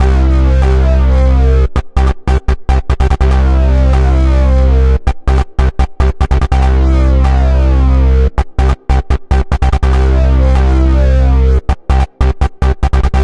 Bass loops for LuSH-101
J-Lee, beat, EDM, percussion, snare, tight, oneshot, compressed, sample, drum, kick, bassdrum, drums, Dance, 4x4-Records, hit